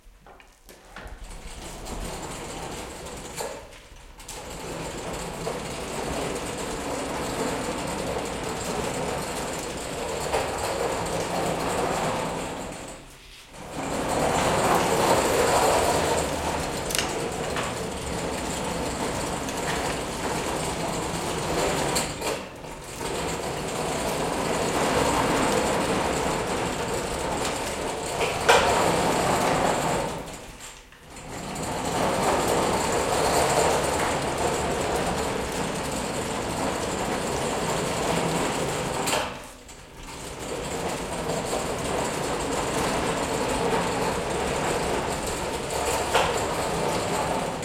metal shop hoist chains thick rattle pull on track slow softer
chains, hoist, metal, pull, rattle, shop, thick, track